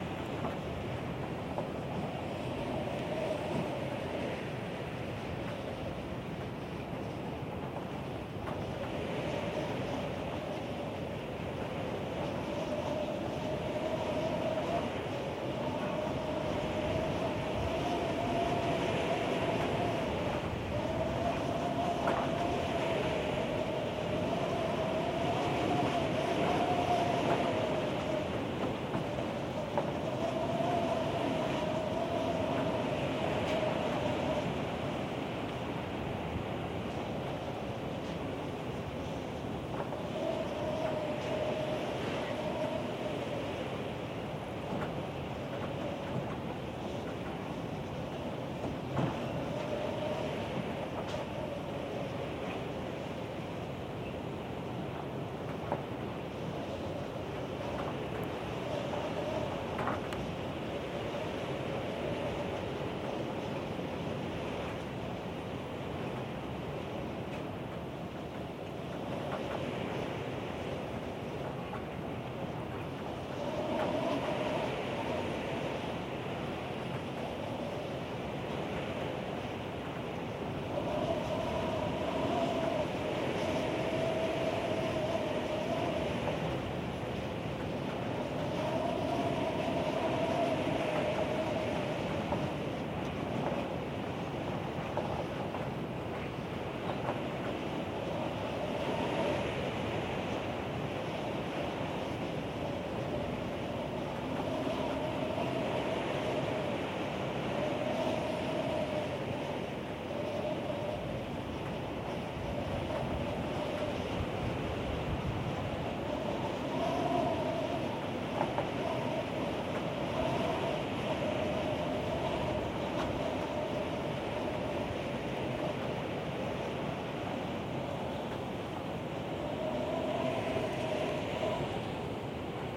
Barn wind 005
This is a recording in a run-down old barn during a heavy windstorm. Lots of rattling shingles, doors, etc.
Recorded with: Sound Devices 702T, Sanken CS-1e
barn, gust, shake, wood, wind, country, storm, rattle, gate, door